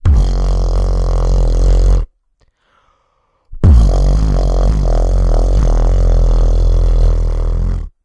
just a quick thing of "Bass" from me mouth
recorded with a blue snowball (condenser microphone)
bass, beat-box, blue, condenser, dnb, mic, snowball